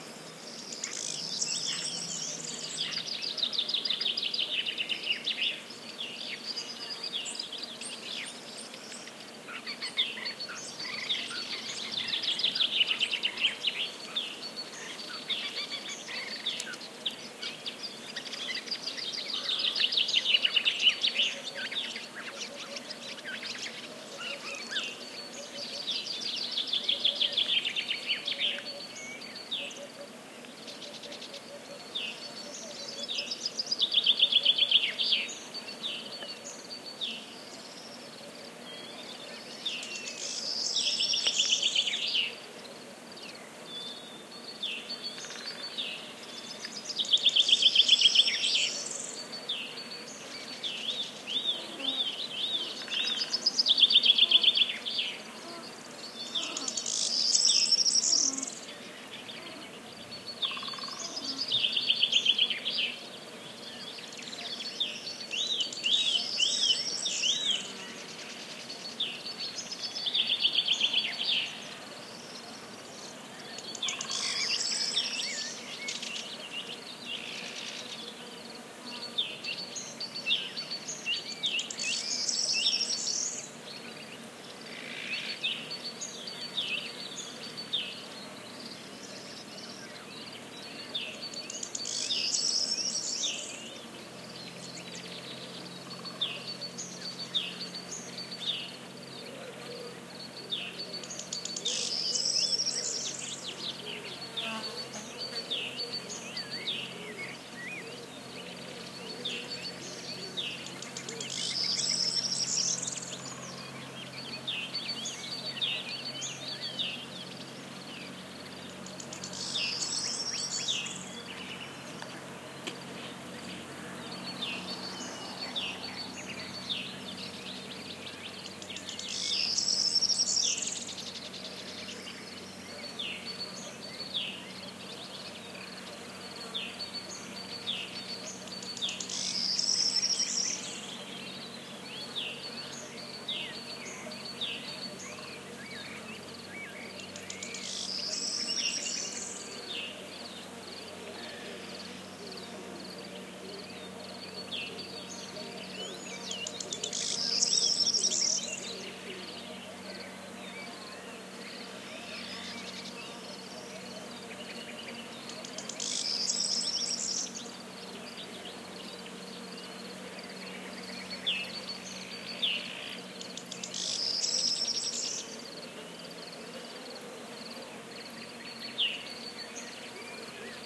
20130418 morning.forest.03
Morning spring ambiance in an open woodland area near the 7m high menhir at Povoa e Meadas (Castelo de Vide, Alentejo, Portugal). Lots of birds (Great Tit, Hoopoe, Corn Bunting). Audiotechnica BP4025, Shure FP24 preamp, PCM-M10 recorder.
spring, Portugal